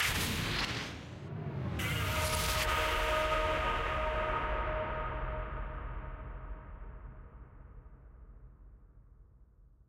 Hit To Explode Game
This is a sound we created to show how simple instruments can make wonderful sounds. All of the sounds it took to make this effect were made in FL Studio by
hip-hop-instrumentals, scoring, soundesign, synthesizer, video-game-music